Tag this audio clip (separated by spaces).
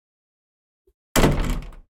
close door foley light wooden